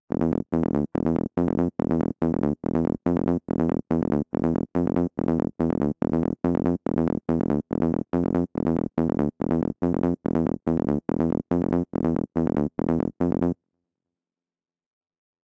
Old School Psy Bass E Arabic 142bpm
oldschool, startpack, Psytrance